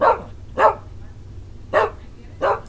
Chubby little scottish terrier barking into a radio shack clip on condenser mic direct to PC.
animal, environmental-sounds-research